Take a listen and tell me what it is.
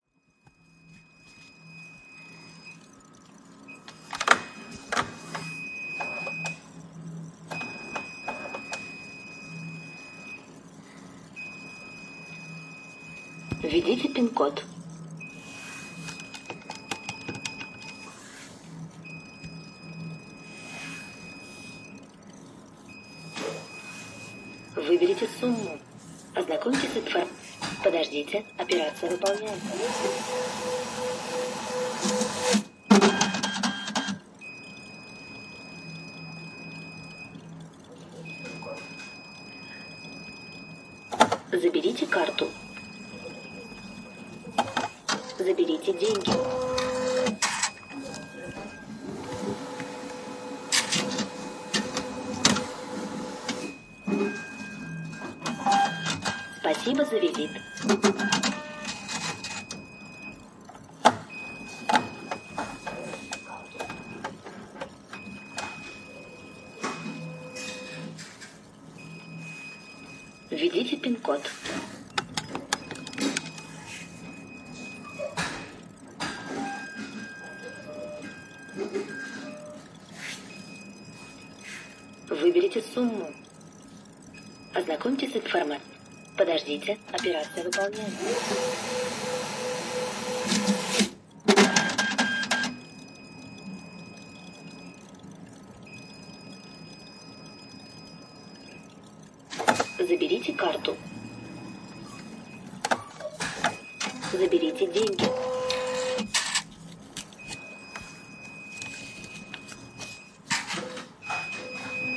Sberbank-ATM-processed
Processed russian atm
16bit, atm, machine, recording, speech, voice